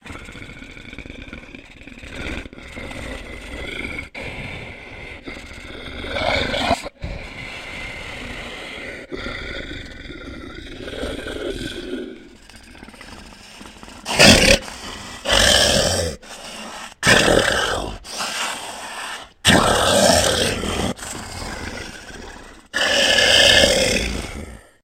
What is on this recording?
I am not looking to be credited at all it's just pure interest. I do this for fun. I did this with my mouth and pitched it down 3 semitones. No other effects were used.